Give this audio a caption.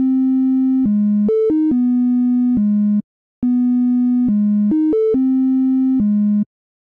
Generic "Nintendo like" melody.
dubstep, 320, melody, 140, 2step, future-garage